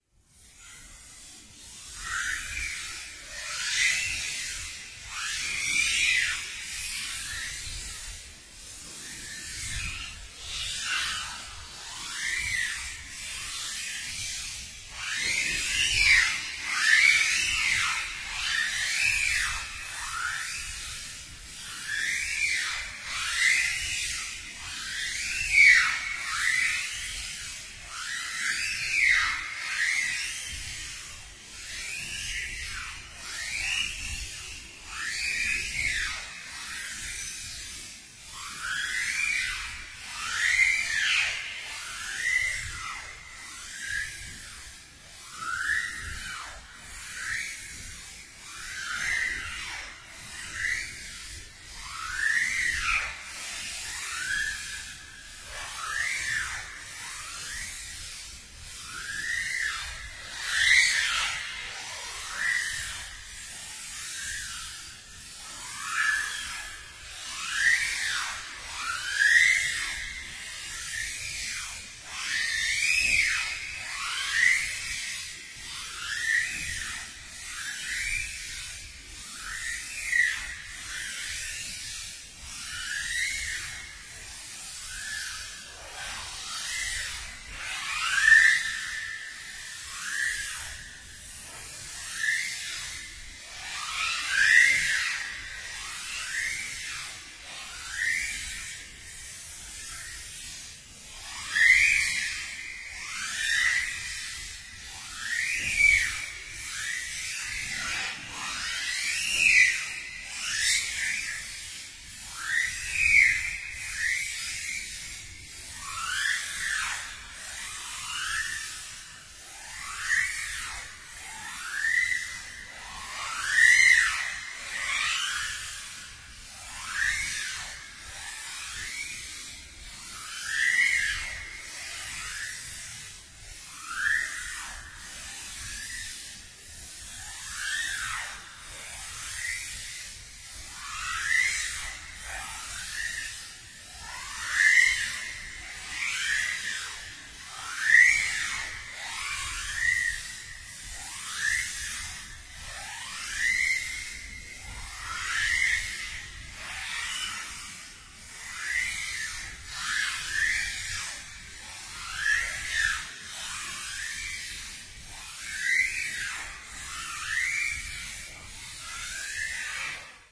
Curtains Stretchedx10
This sound was just begging to be stretched !!!
Used paulstretch to stretch the sound x10 with a 576 sample window.
processed, stretched, paulstretch, strange, remix, soud-remix